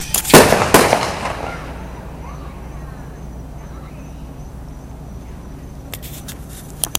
raw firework niceshot
Fireworks recorded with Olympus DS-40 on New Year's eve 2009.